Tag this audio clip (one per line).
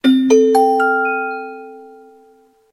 horror
detective
horror-fx
Suspicion
reasoning
horror-effects